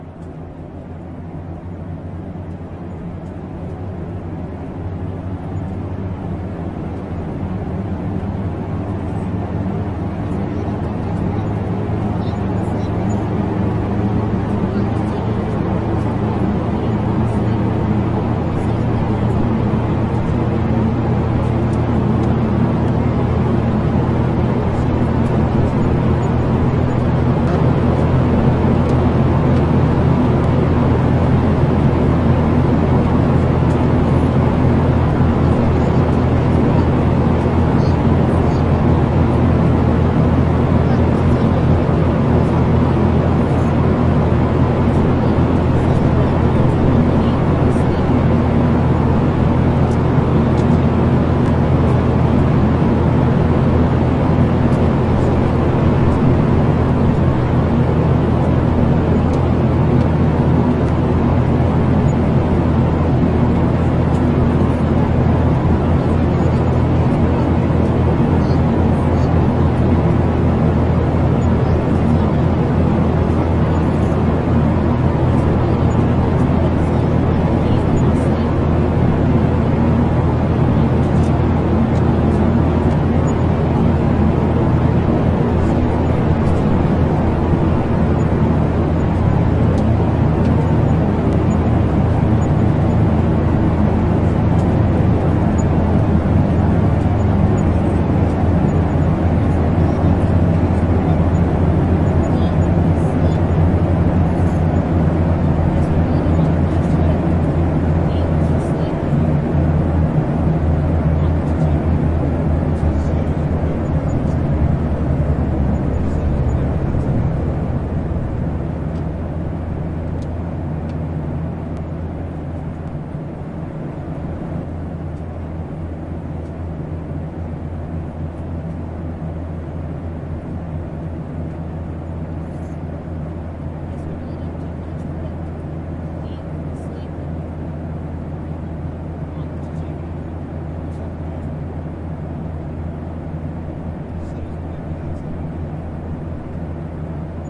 Airplane thrust up during take off. own editing